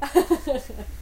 20080108.female.laughing.01
short Regina's laughter
vocal, laughter, young, female